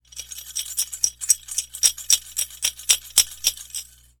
Me shaking a piggy bank/coin bank.
Recorder on a Neewer-N700.